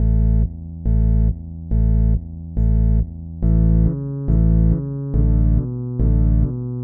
hl2 140bpm
A simple loop made today. :) No VSTi or VST, just a sample generated by Wolfram Mathematica.
4-beat, loop, synth, bass, 140-bpm, simple, 140bpm